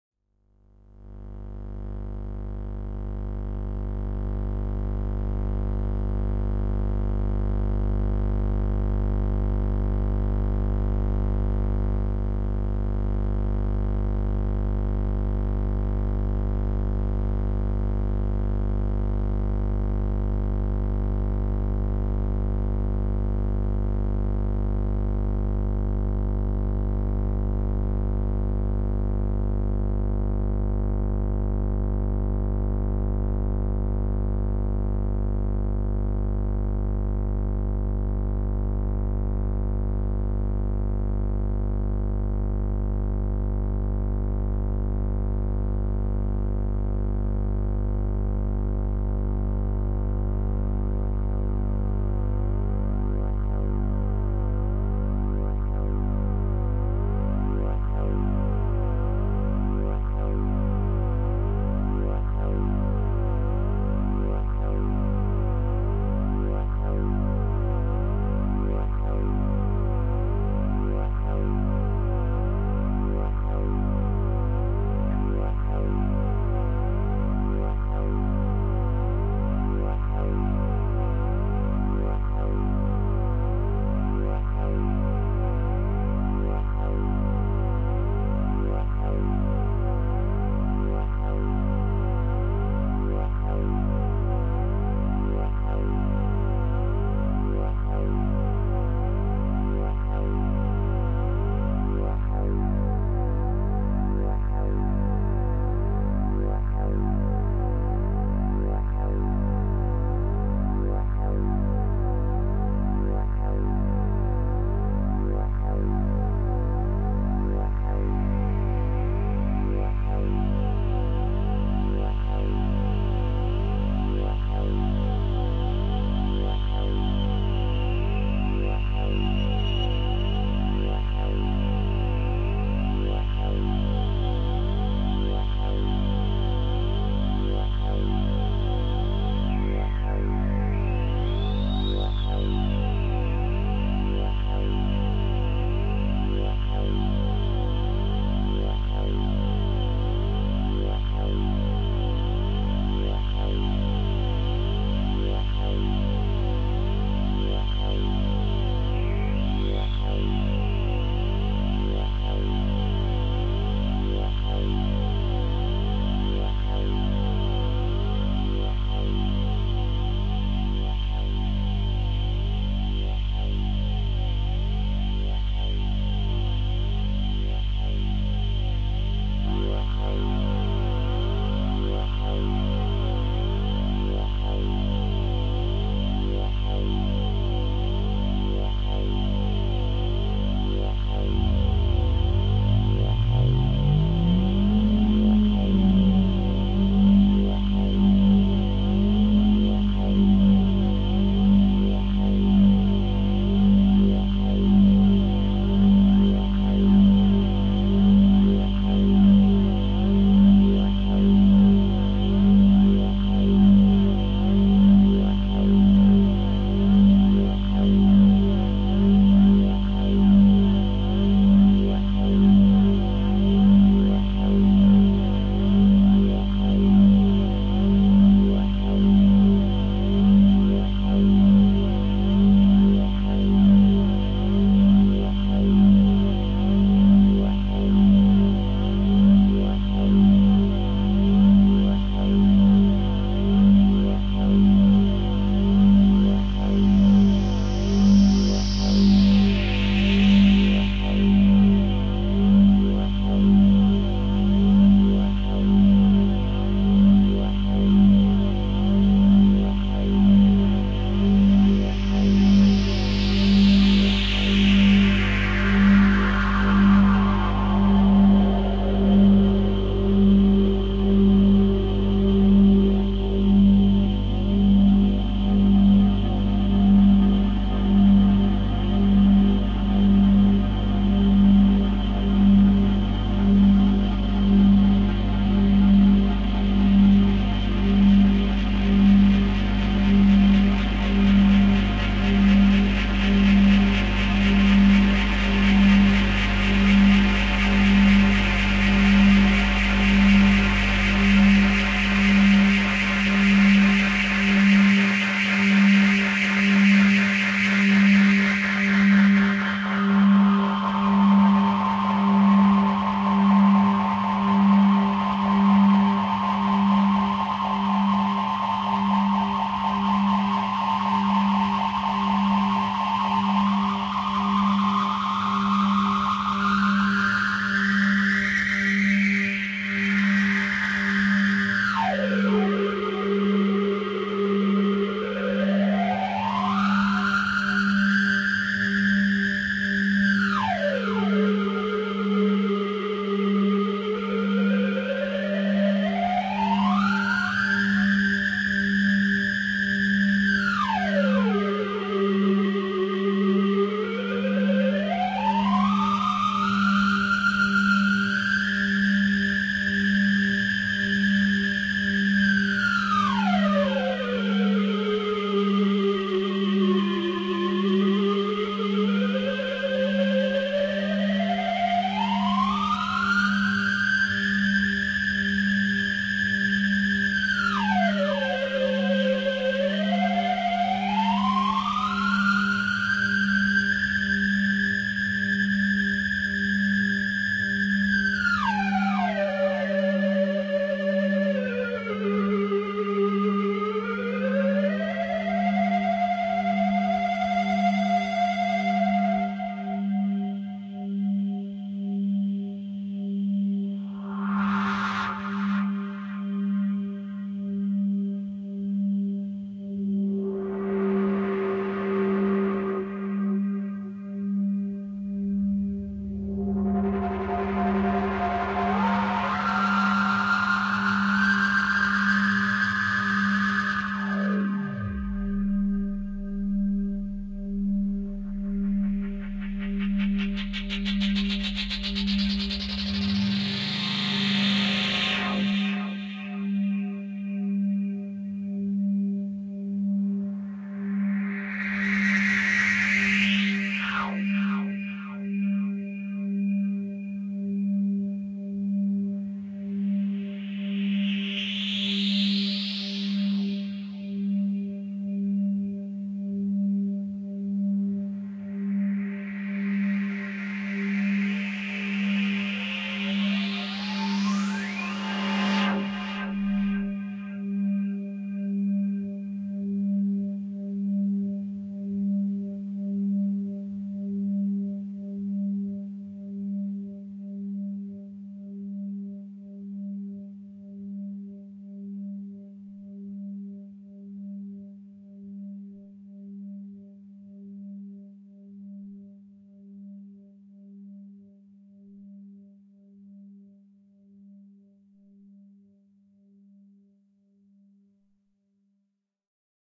This sound was created using three Korg Monotrons (Original, Duo and Delay) with the help of a Behringer V-amp2 for FX and feedbacks. All the sounds were manipulated in real-time, no post-processing was done to the track. Ideal for sampling and create new SFX or for ambiances. The title correspond to the date when the experiment was done.
Ambiance, Ambience, Ambient, Atmosphere, Cinematic, Dark, Drone, Film, Free, Horror, Movie, Spooky, suspenseful